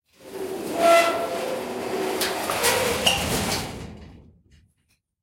long-metal-scrape-05
Metal hits, rumbles, scrapes. Original sound was a shed door. Cut up and edited sound 264889 by EpicWizard.
bell, hammer, impact, iron, lock, metallic, nails, percussion, scrape, shield, ting